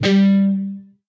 Dist sng G 3rd str pm
G (3rd) string. Palm mute.